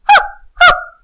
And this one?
dog bark small
Small dog barking two times in quick succession
(no animals were harmed - this sound was performed by a human female).
Performed and recorded by myself.